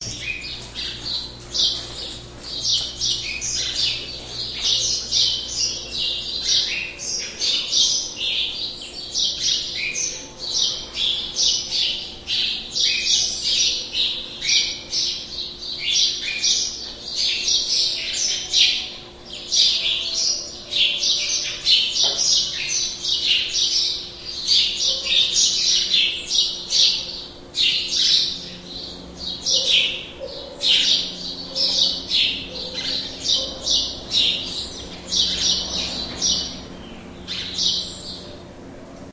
Cantos de Passaros
birds, passaros